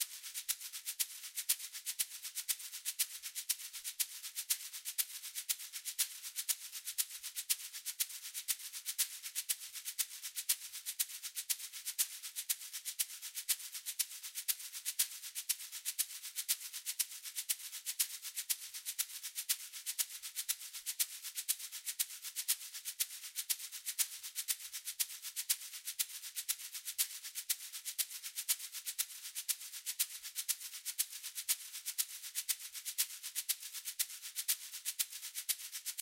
Song7 SHAKER Do 3:4 120bpms
Do, Shaker, rythm, bpm, beat, 120, Chord, loop, blues, HearHear